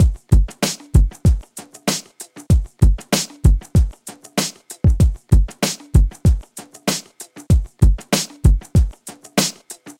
breakbeat hiphop A4 4bar 96bpm
Simple beat recorded from Octatrack, processed with Analog Heat. Four bars, 96 BPM. 4/6
breakbeat, hiphop